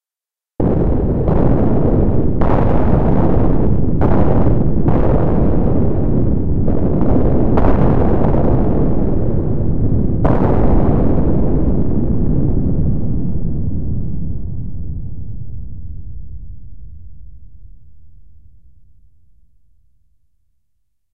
spaceship explosion1far
made with vst intrument albino
blast, energy, explosion, fighting, fire, firing, futuristic, fx, gun, impact, impulsion, rumble, sci-fi, shoot, shooter, shooting, sound-design, space, spaceship, war, warfare, weapon